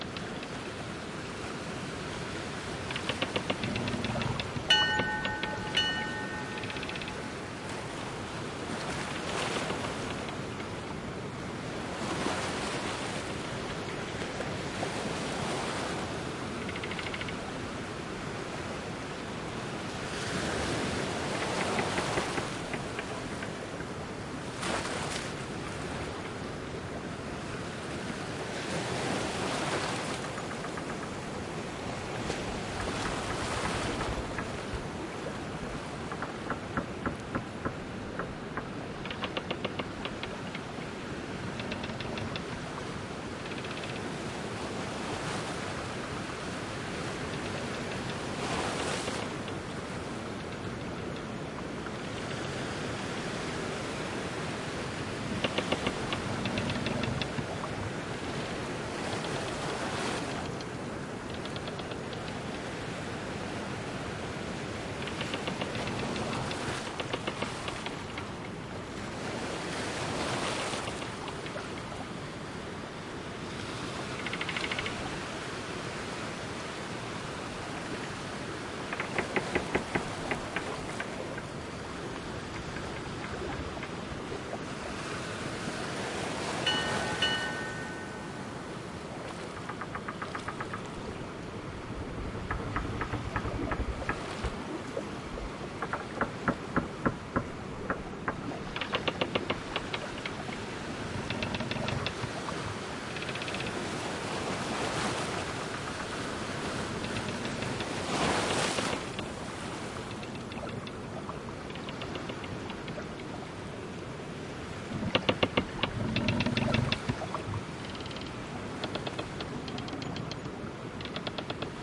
PIrate Ship at Bay w.out Seagulls
It wasn't great quality2. It didn't have stereo effects3. It was quite short (only 10 seconds of actual audio)Despite this, it was a great sample and I knew I could fix it up a bit. A higher quality, longer, and fully loopable remix using only a few components of the original. Enjoy, comment and rate!NOTE: This version does not include seagulls.
water, sea, bay, bell, creak, soundscape, pirate, ocean, ship